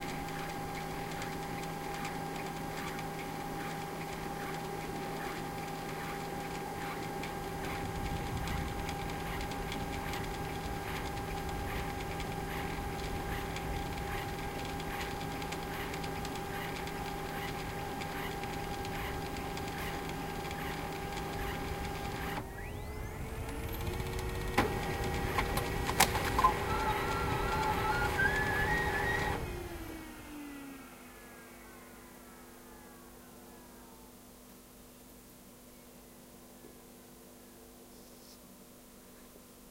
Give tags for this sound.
clog; environmental-sounds-research; field-recording; laser-printer; machines; office; printer

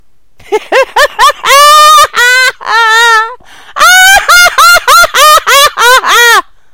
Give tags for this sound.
female; laughing; laughter; woman; laugh; giggle

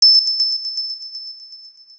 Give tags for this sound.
coin; item; sucess; up; pick; ping; win; bonus

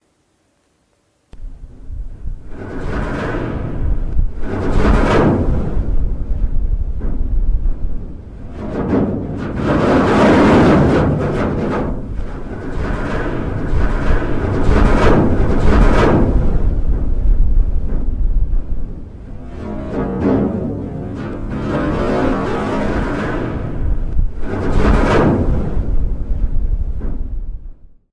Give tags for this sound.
environment; noise-pollution; ship

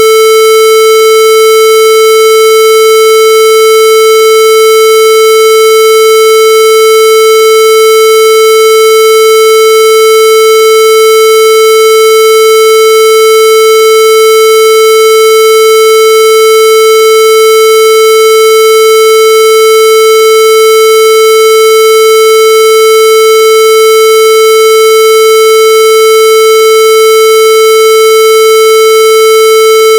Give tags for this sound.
8bit; chip; square; tune

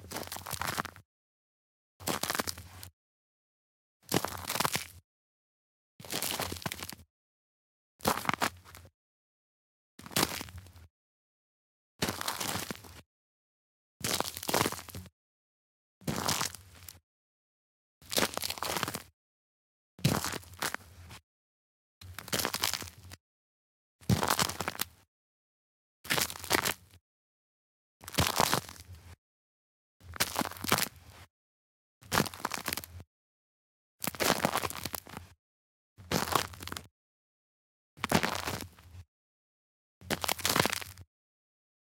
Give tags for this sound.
Ice; Outdoors; Crunch; Snow; Walking; Footsteps; CloseUp; Crunchy; Outside; Field-Recording

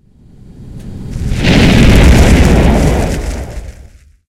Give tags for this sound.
explosion; inferno; burst; fire

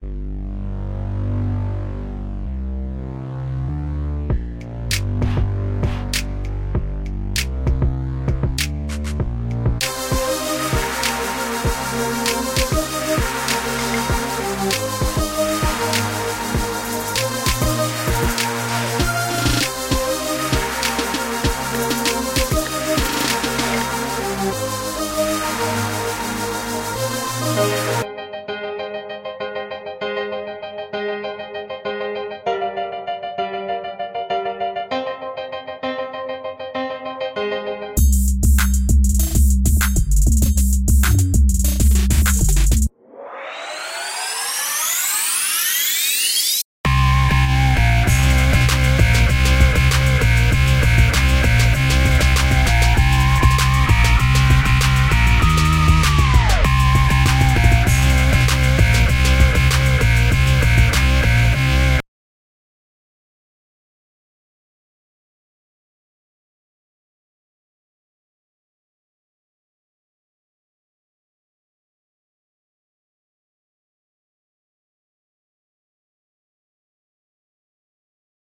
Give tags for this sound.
music; intro; free

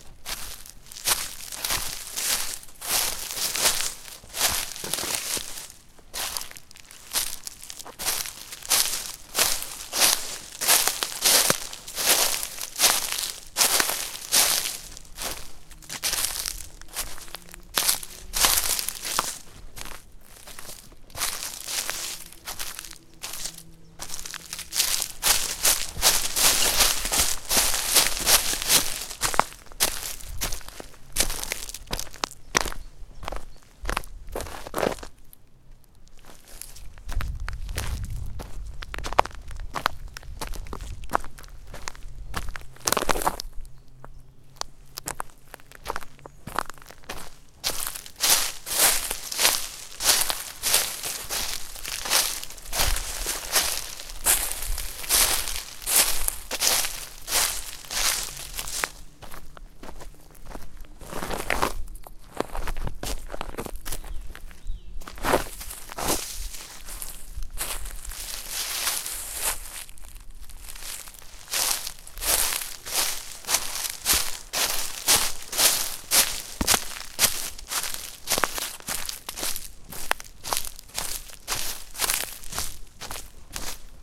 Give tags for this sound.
running; forest; gravel; leaves; Walking; steps

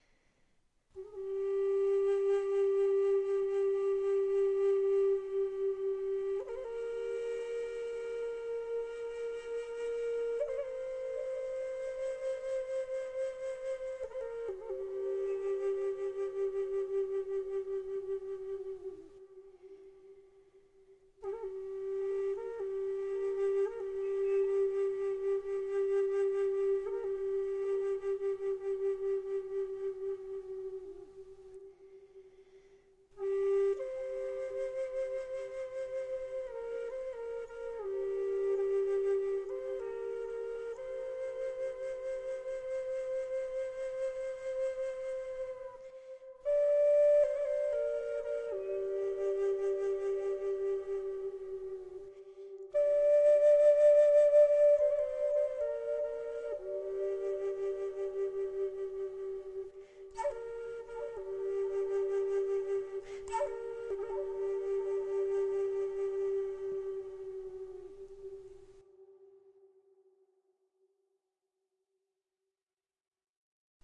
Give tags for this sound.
echo; native; haunting; haunted; flute; canyon

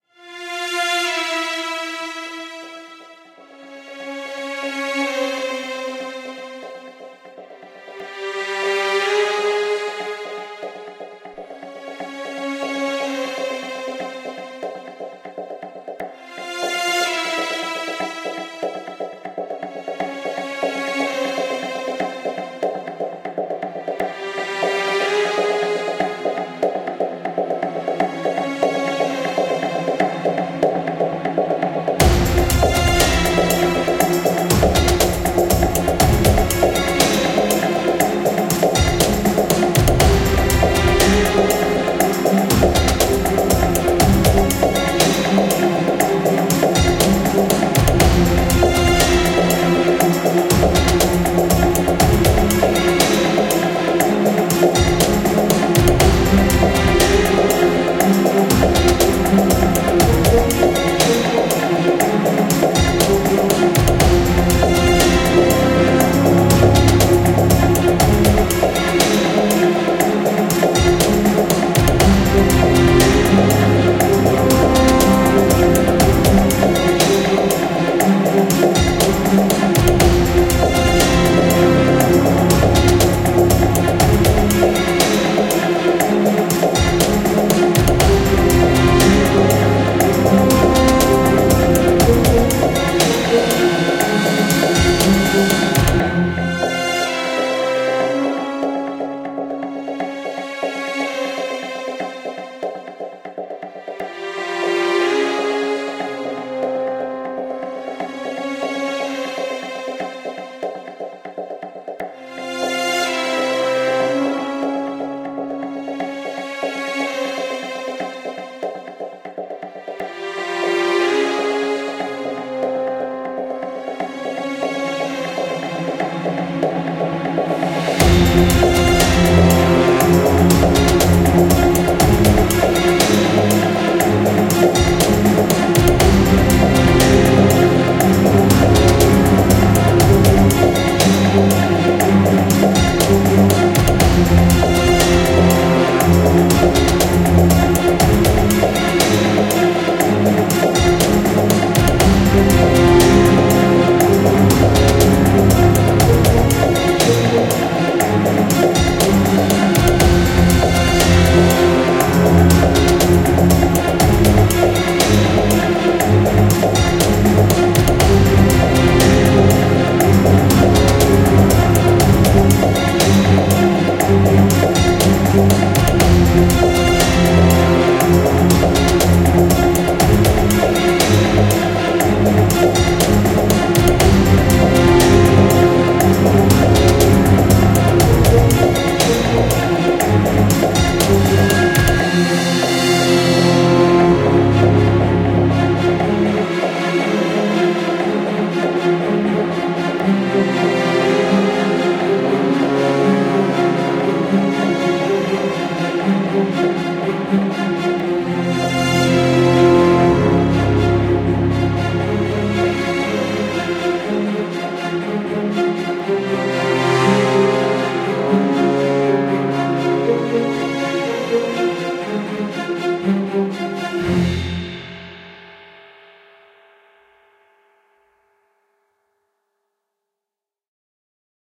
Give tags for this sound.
choir; choral; cinematic; classical; electronic; ethnic; experimental; first-nations; flute; instruments; music; native; neo-classical; sci-fi; singing; software; voice; voices

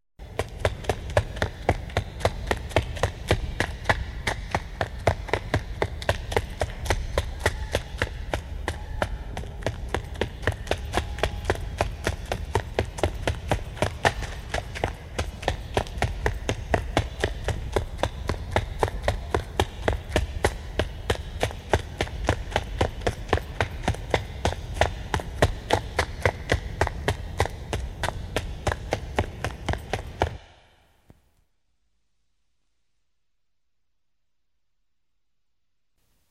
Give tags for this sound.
footsteps; heavy; running

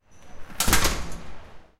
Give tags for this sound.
slam; metal-door; sharp; campus-upf; UPF-CS14; shut; close

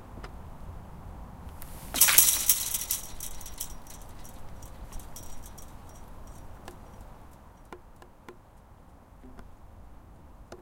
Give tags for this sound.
fence; iron; Kicking; metal

